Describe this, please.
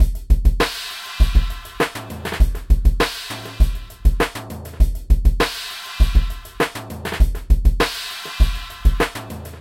100 BPM Insistant beat mix
Fill for Frenetic Brush Beat 100 BPM Created with DP & MACH 5
100, beat, bpm, brush, fill, frenetic